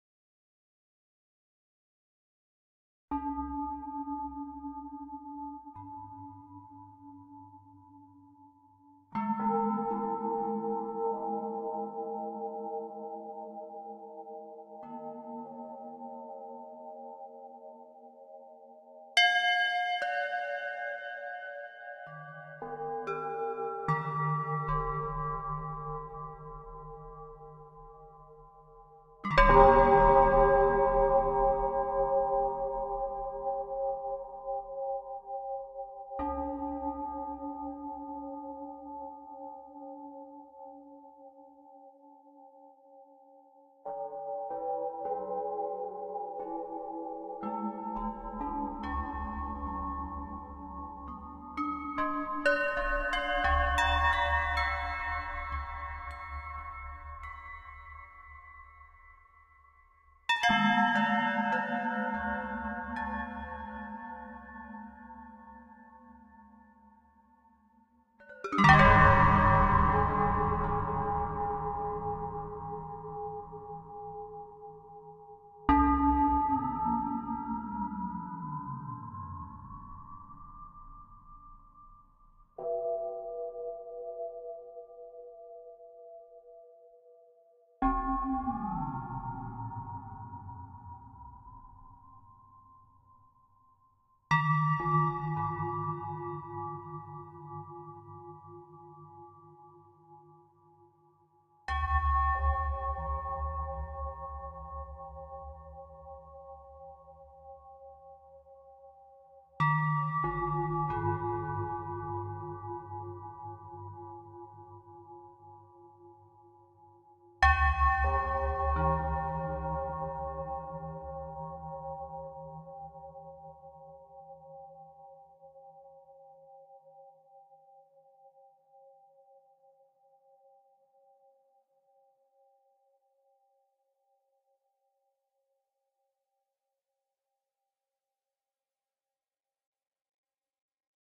This sound was generated in Camel Audio's Alchemy (software synthesizer) using granular synthesis of a sample of a ceramic bowl being struck. The resulting sample was recorded live in Apple's Logic (DAW) using a keyboard controller and processed in BIAS Peak (sample editor).